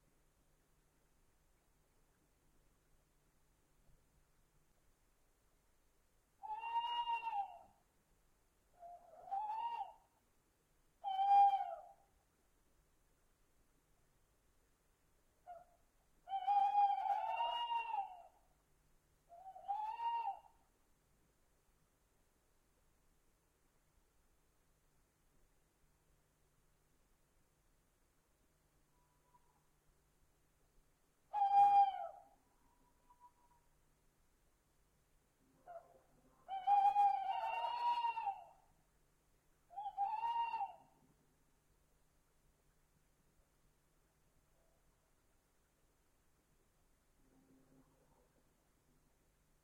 Owls loop denoised
A denoised version of "83985__inchadney__Owls", originally uploaded by the user inchadney. The sample can be played in loop
tawny-owl, owls